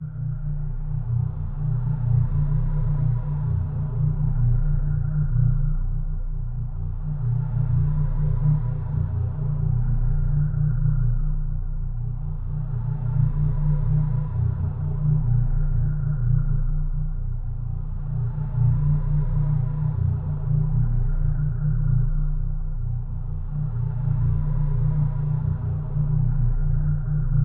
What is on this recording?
A low engine hum